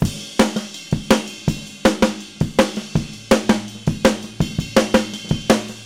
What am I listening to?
surf-ride-loop
A loop of a rock beat, with the ride cymbal, this is the one that sounds kind of surf-like.